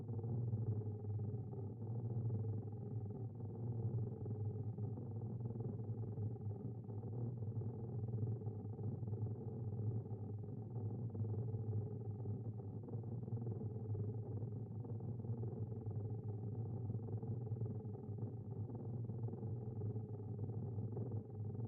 Growls animals digital feedback failures 02
Mutated growls with Pro Tools TDM 6.4 plug-ins
Digital-error, Fail, Insanity, Sick, Textures, Growls, Failures, Madness, Sickly